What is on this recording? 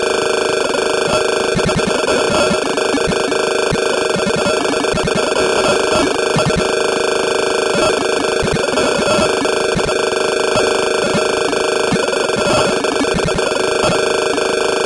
Circuit bent speak n spell toy. Hand glitched and relocated to
computer...Disclaimer:No speak and spells were harmed during
the circuit bending process, although they now have speech difficulties